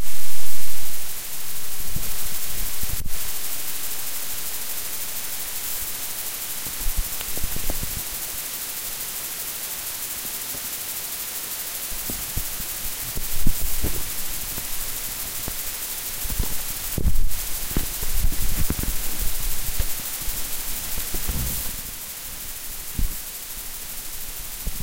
Electric noise.
Recorded with Edirol R-1 & Sennheiser ME66.